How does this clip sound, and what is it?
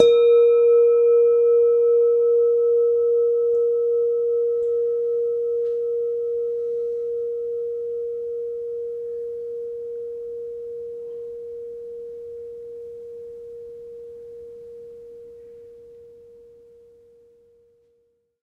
mono bell -4 A# 18sec
Semi tuned bell tones. All tones are derived from one bell.
bell, bells, bell-set, bell-tone, bong, ding, dong, ping